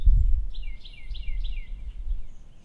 Another recording of a bird chirping, recorded with my trusty Zoom H4N. This turned out a lot better than the other bird chirp I've posted here.